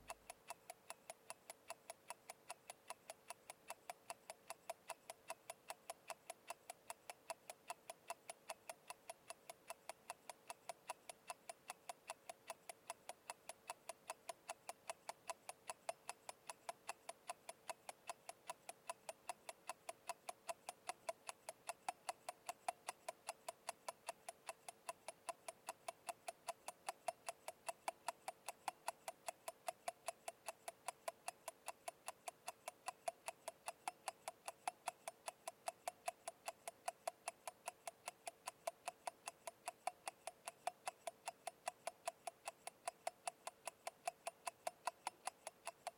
Old Pocketwatch Loop
Seamlessly loopable recording using a contactmicrophone on an old pocketwatch.
Ran it through a DBX 586 Vacuum Tube Preamp
chronometer, chronometre, clock, loop, loopable, pocketwatch, tick, ticking, time, watch